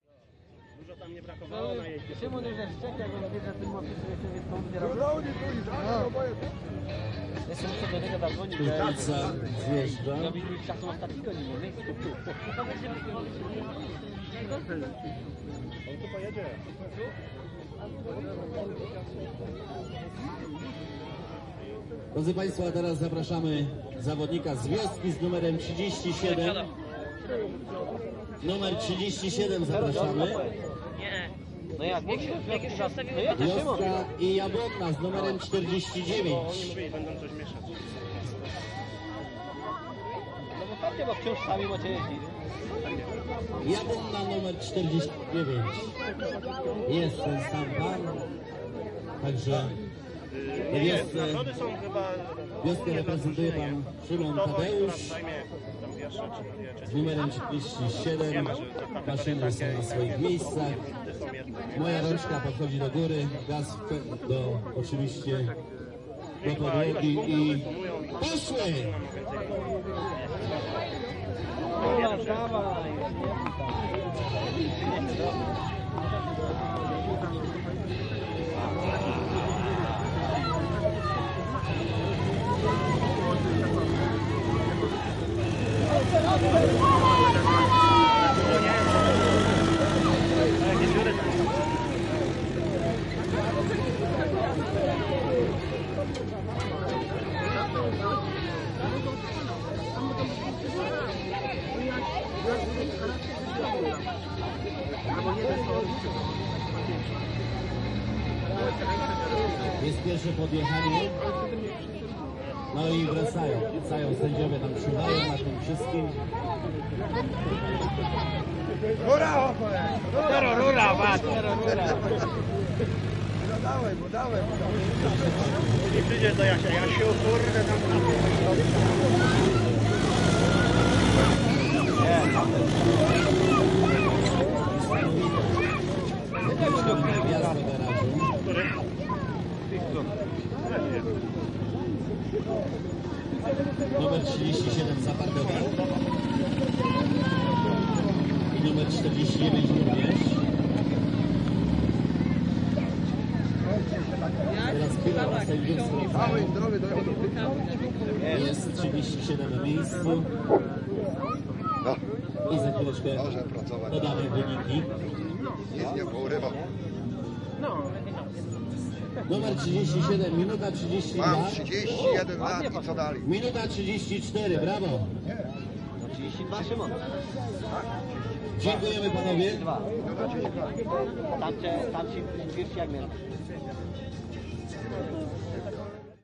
the First Majster Trak - race of tractors in Wola Jablonska village (Polad). The event was organized by Pokochaj Wieś Association.
Recorder: marantz pmd661 mkii + shure vp88